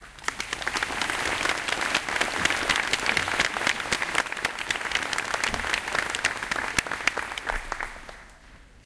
applause big crowd
A big crowd of people clapping and applauding. Recorded with Sony HI-MD walkman MZ-NH1 minidisc recorder and a pair of binaural microphones.